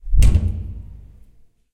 airy hit
door, hit